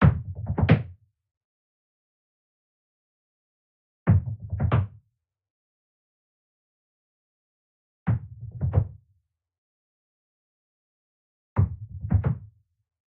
Bass Drum Fx1
A bass drum hit with mallet. Recorded with an AT2020 through a fast track. Edited in Ableton.